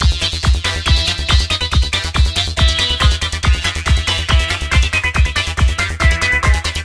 TR LOOP - 0512
goa goa-trance goatrance loop psy psy-trance psytrance trance
goa, goa-trance, goatrance, loop, psy, psy-trance, psytrance, trance